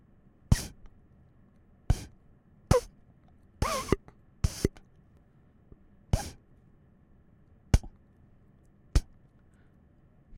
Created using a suction cup and a football.
pop, ball